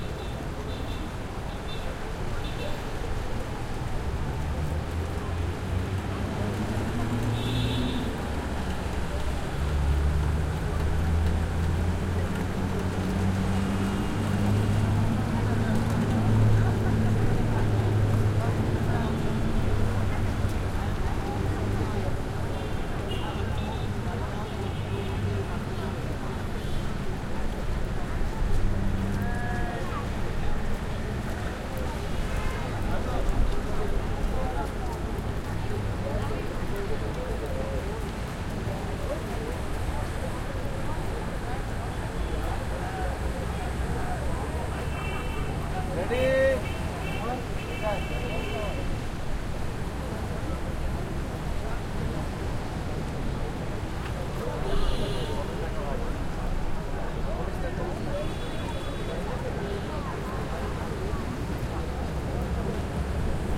boardwalk near busy street downtown low angle pedestrians footsteps and nearby heavy traffic2 India
angle, boardwalk, busy, downtown, footsteps, India, low, near, pedestrians, street, traffic